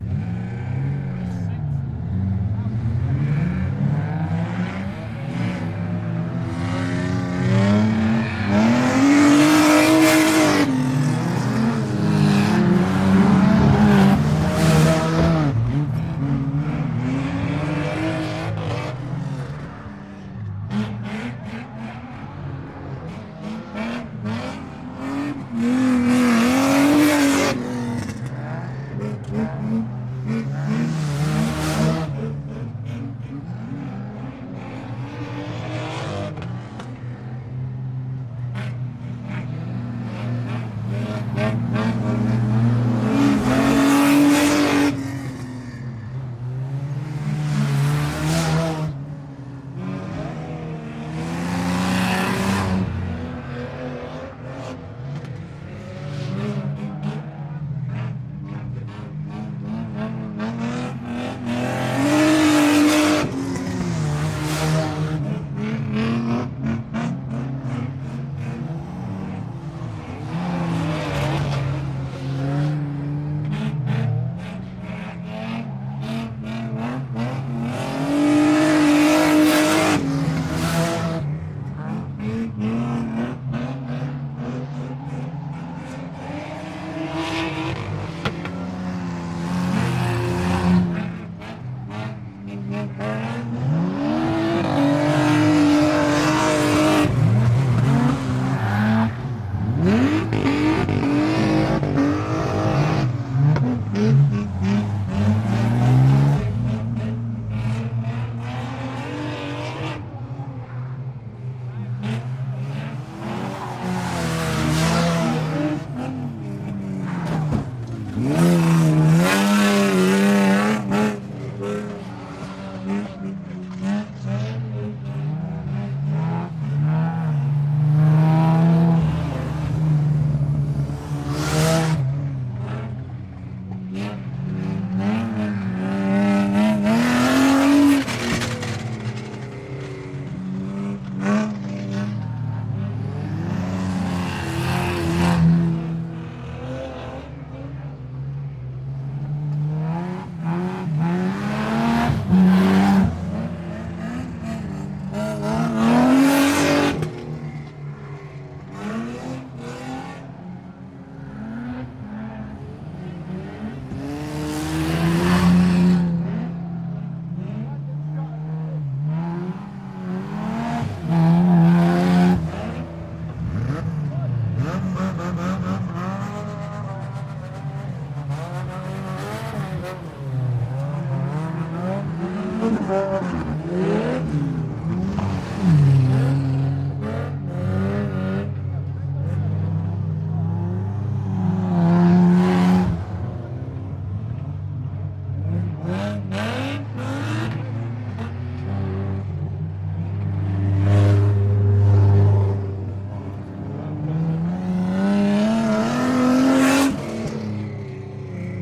Raceway Cars Racing 5 - Long, Hard Revving
car, drive-by, driving, engine, motor, race, raceway, racing, rev, revving, speedway